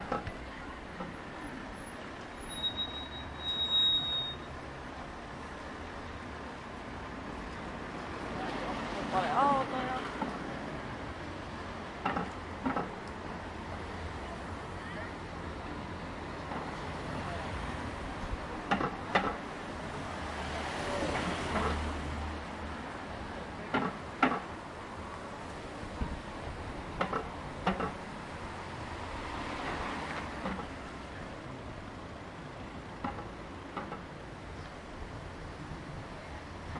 Traffic Sound in Prag
cars, city, prag, street, town, traffic, urban